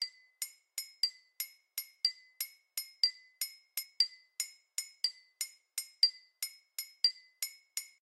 This is a Bellish sound I created with two glasses at my home.